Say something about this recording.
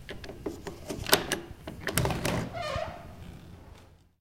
DOOR WOOD OPEN LITTLE CREAK